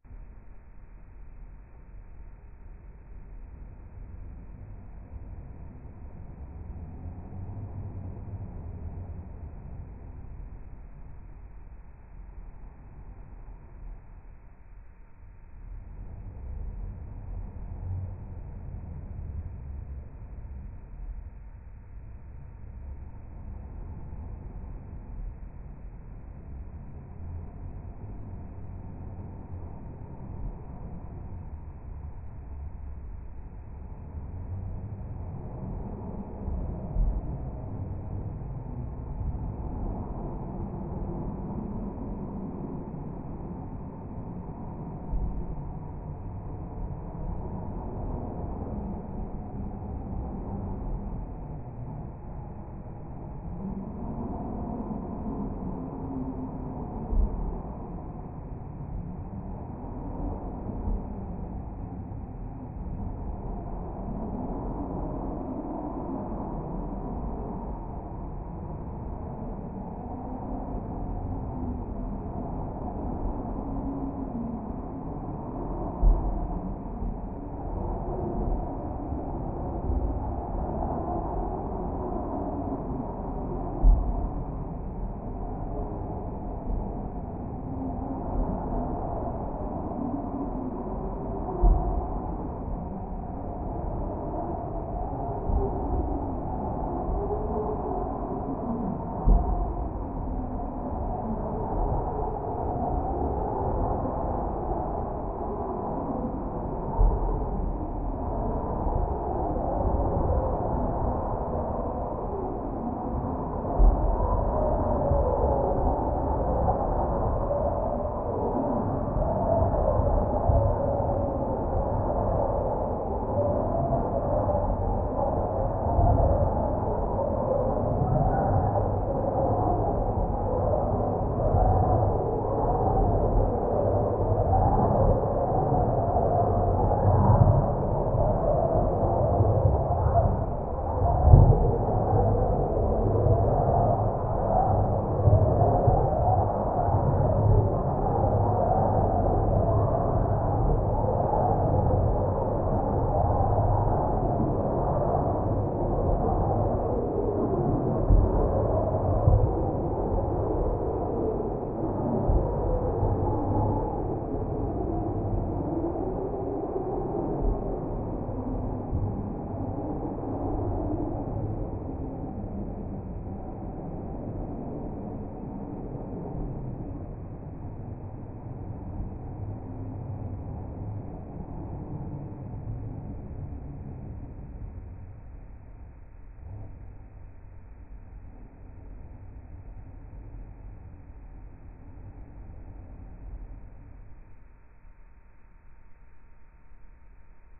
This is actually a recording of me moving around a can of seltzer on a table slowed down 3 octaves.
Recorded on January 15th, 2022.